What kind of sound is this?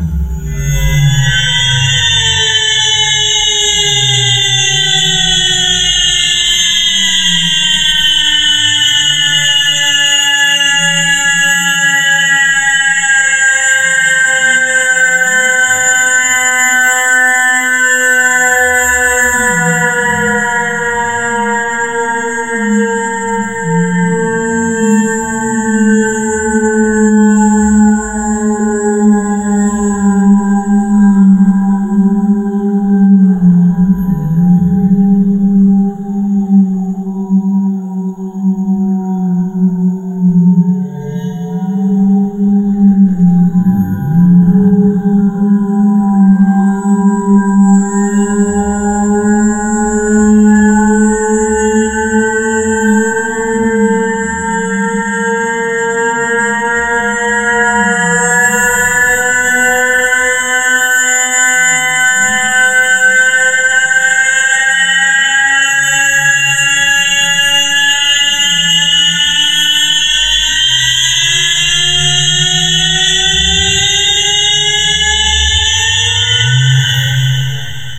It's remarkable that there is still such a interest in Star Trek and similar stuff. So, I make some Space sounds up and then as long as there are downloads. This clip illustrates the coming and going of a military romulan space-ship, a small surveilance craft, only 110 meters long.